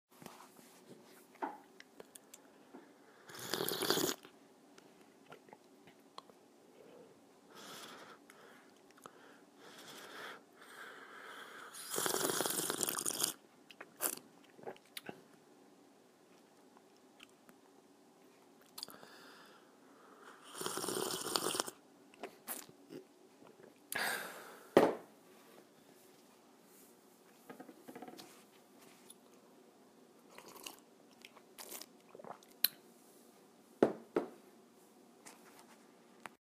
Sipping Tea
This is me sipping a cup of tea and then swallowing it. It is a very distinct and sharp sound.
cup, tea, drinking, swallowing